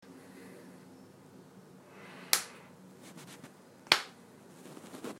Click Close And Open
This is a sound track of a toothpaste lid clicking open and close, this is multi use despite this
click, close, lid